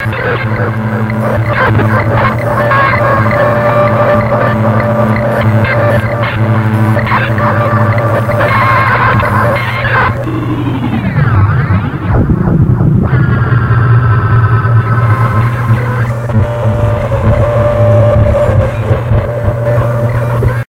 bent Speak & Spell too
circuitbent speak&spell with Kaoss pad effects
bent, spell, circuit